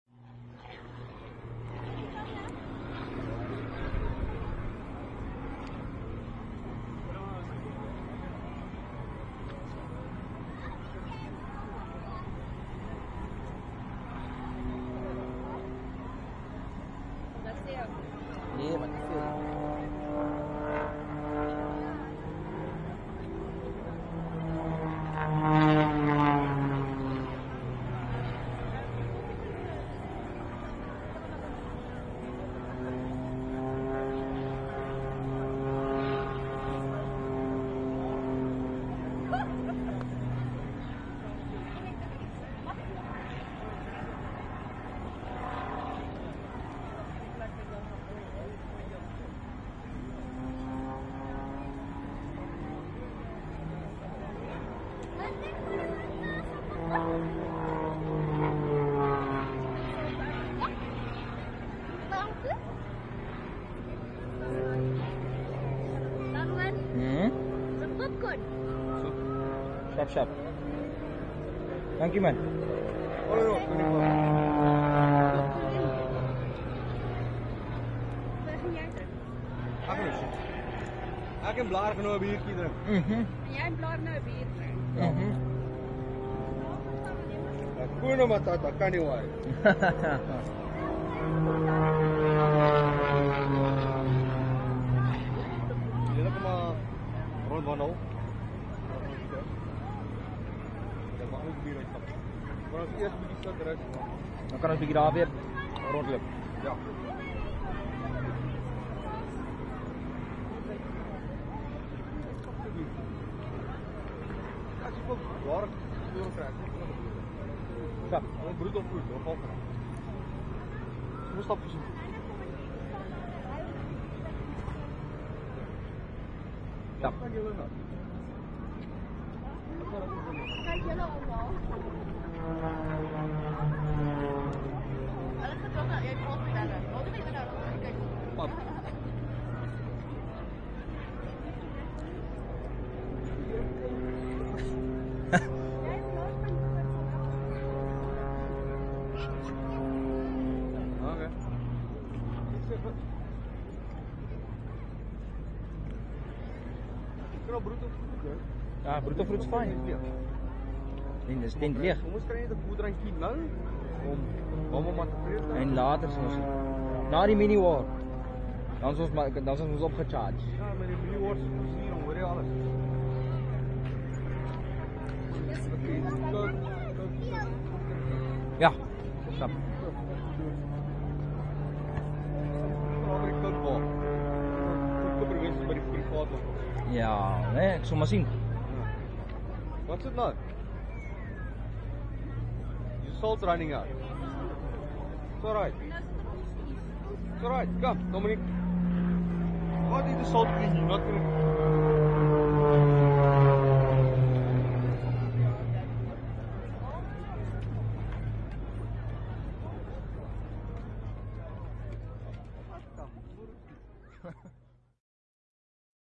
The aerobatics team performing a wonderful display of the MX2 aircraft.
aerobatics, radial, military, fly-past, air-force, flimsy, aviation